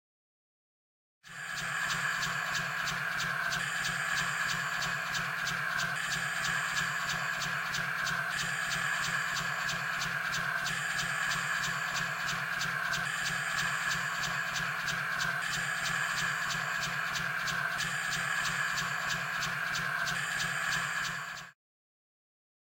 15 inside engine of spaceship
Inside engine of spaceship
scifi, spaceship, engine